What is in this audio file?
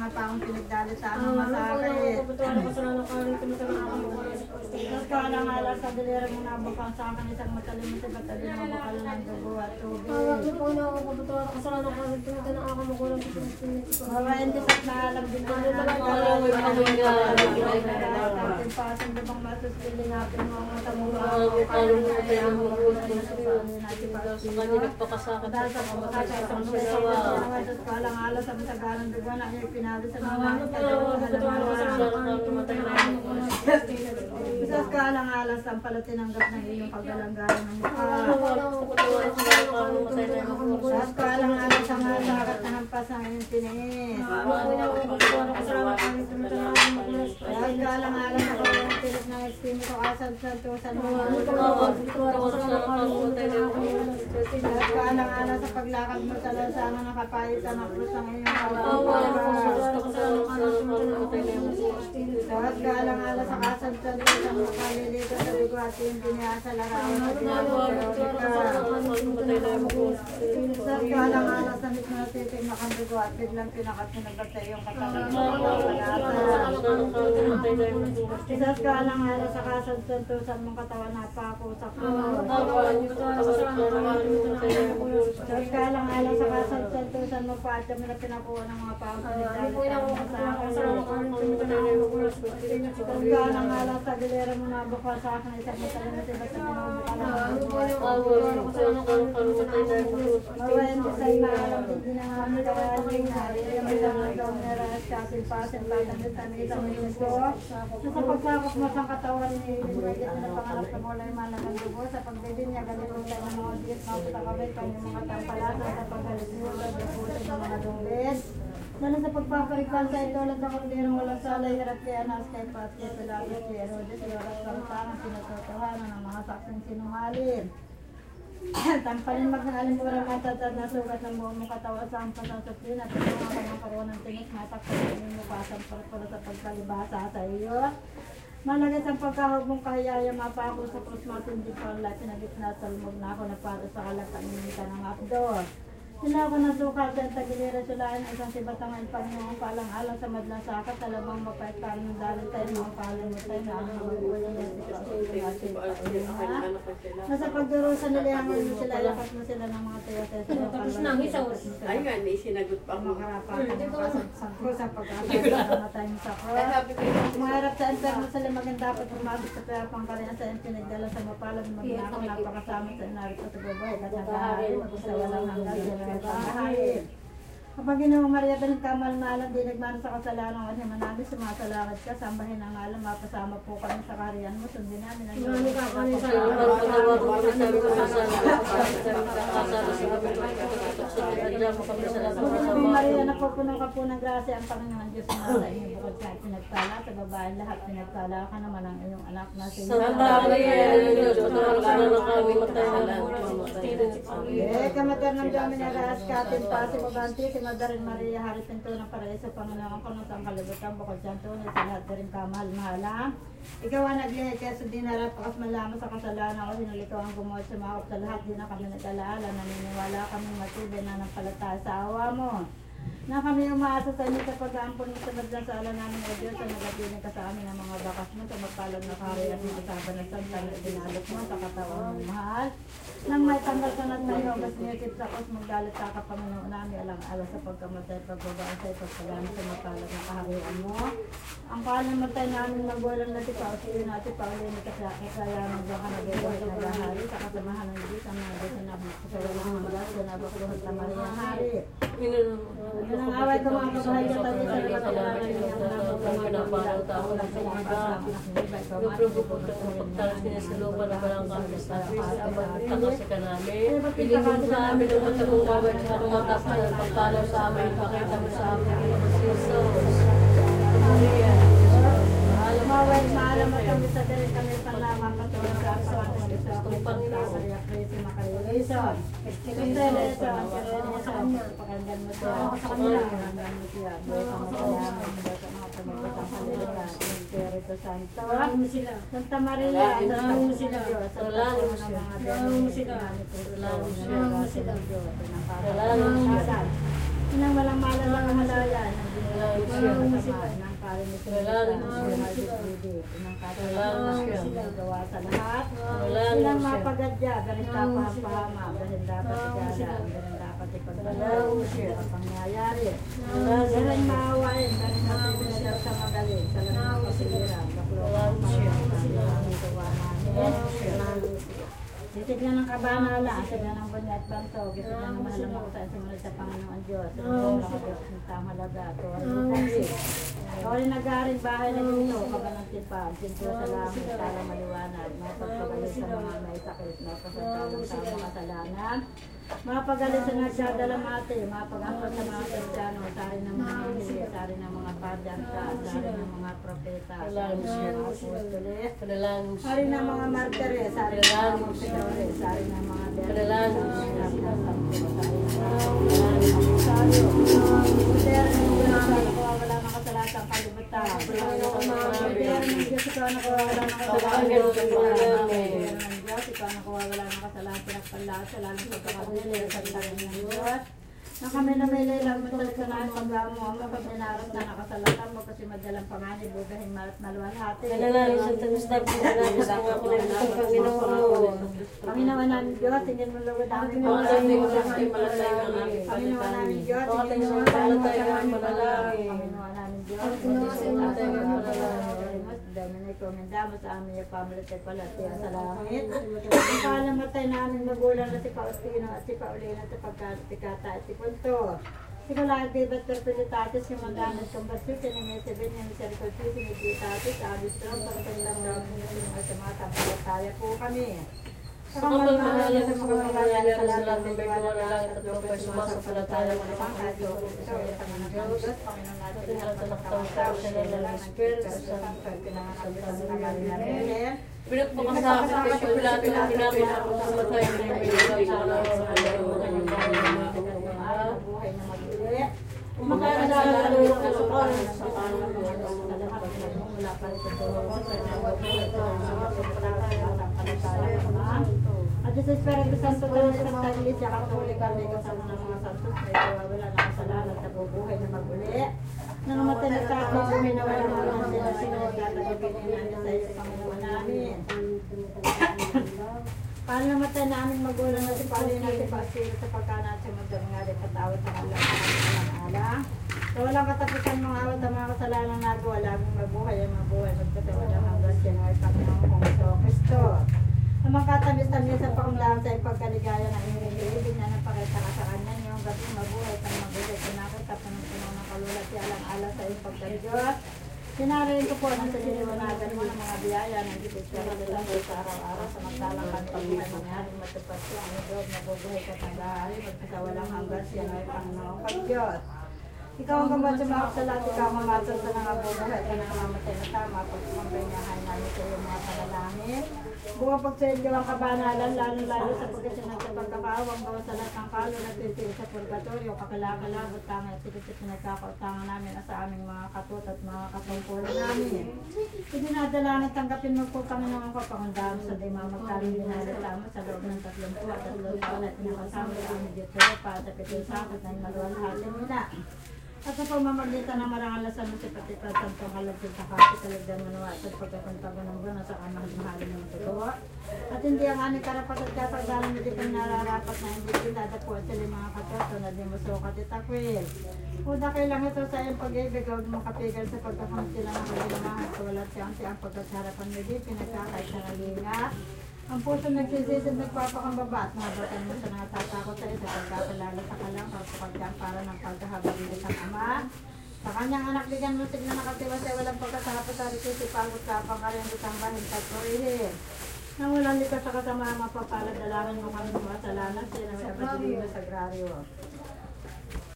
LS 33470-PH-DayOfTheDead
Day of the Dead prayer in Philippines.
In Philippines, for the Day of the Dead, families go to cemetery to pray for their dead people, and to clean the tombs. If the families have saved enough money, tombs are built like houses (with walls and roof).
In this recording, ladies are praying and chanting for their ancester, while men are cleaning the tomb. In the background you can hear some sounds from the surrounding, including the traffic from the small road passing along the cemetery.
Recorded in November 2016, with an Olympus LS-3 (internal microphones, TRESMIC ON).
High-pass filter 160Hz -6dB/oct applied in Audacity.
prayer, ambience, chanting, catholic, atmosphere, field-recording, ladies, Day-of-the-Dead, praying, voices, soundscape, women, Philippines